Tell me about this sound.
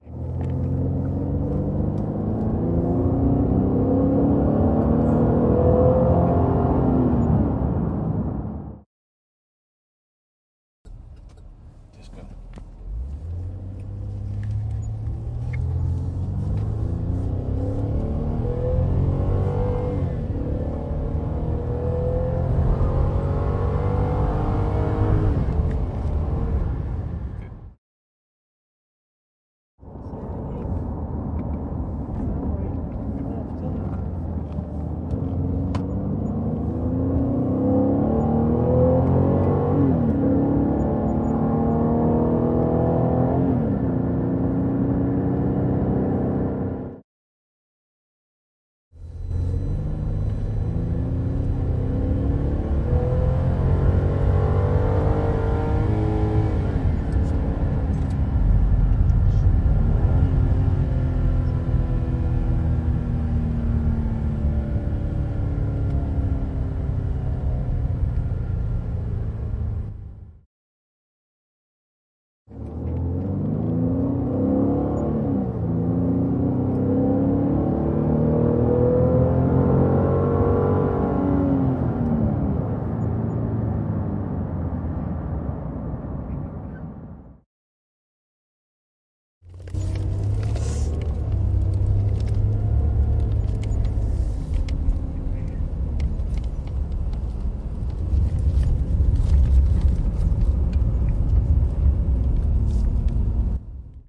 CarV6AcceleratingInsideCabin
Clips string together with 2 second gaps between them. Captured inside the cabin in a Chevy Traverse V6 accelerating hard. Captured with a Tascam DR-40.